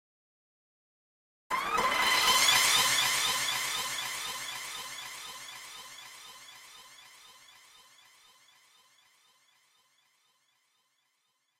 shuniji sweep01
Recorded from Shuniji vst plugin. 2 octave C to C glide (white notes only)
jungle, sweep, Shuniji